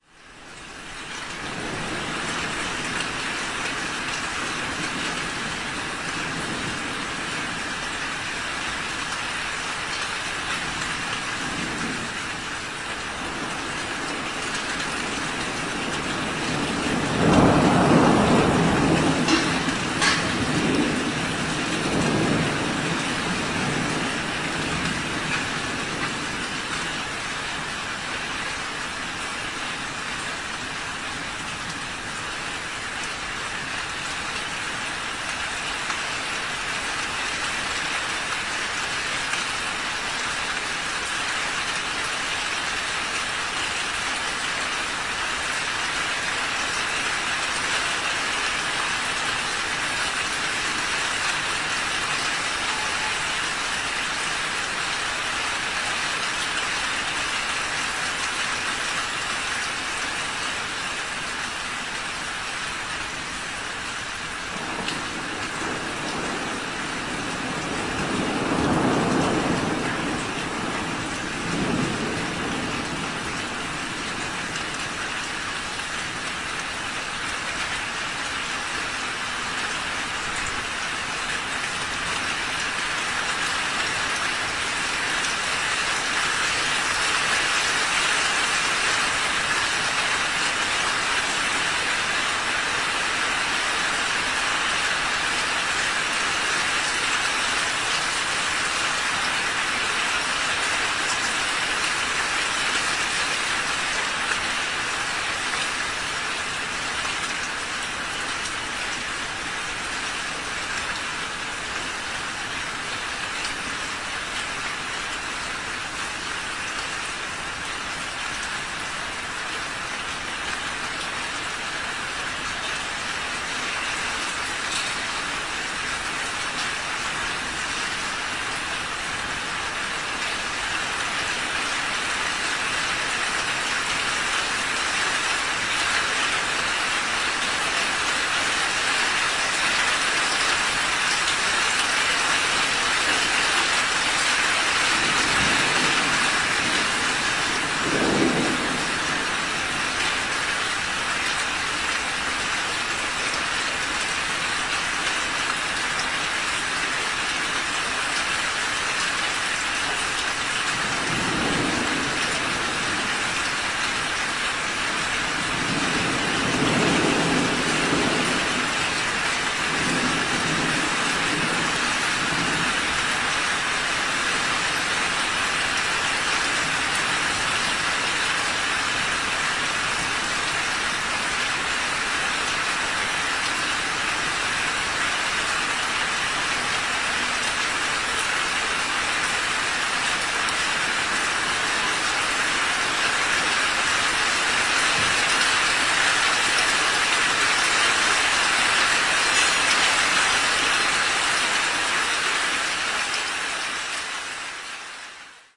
22.05.2010: about 17.00. The thunderstorm in Poznan. The recording from my balcony on the first floor. In the middle of the recording it is raining really hard.
more on:
courtyard, field-recording, noise, poland, poznan, rain, swoosh, tempest, thunderstorm